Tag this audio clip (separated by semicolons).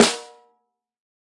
1-shot; drum; multisample; snare; velocity